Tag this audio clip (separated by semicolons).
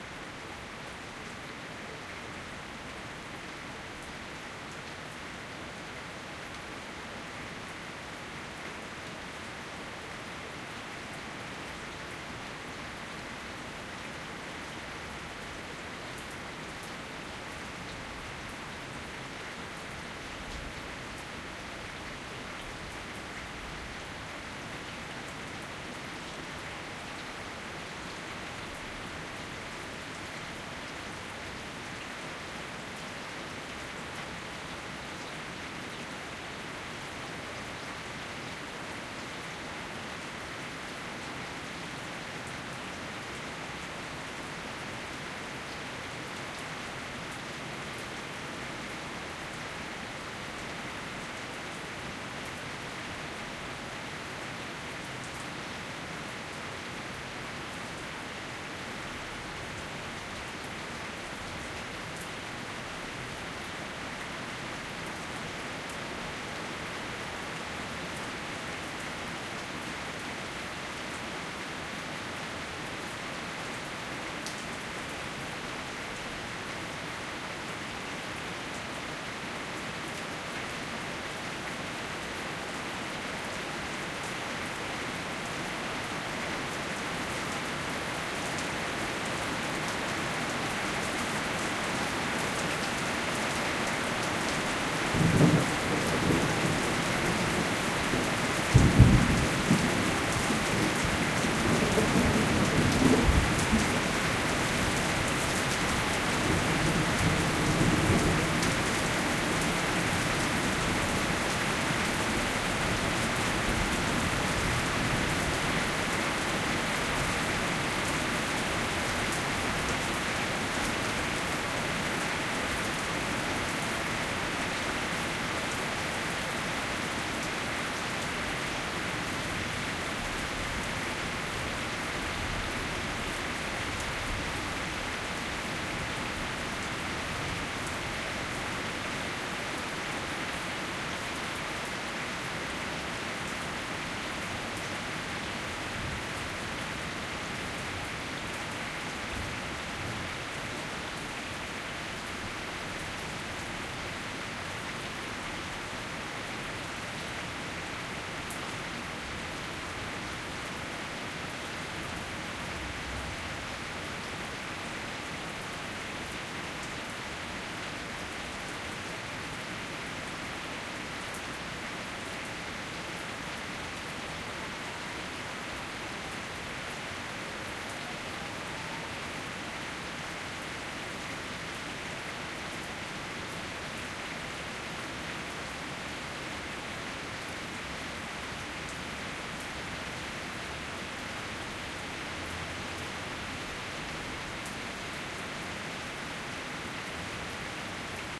splatter; field-recording; thunder; water; stereo; wet; atmosphere; drops; weather; rain